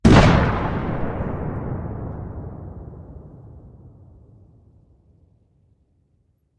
An explosion
Want to use this sound?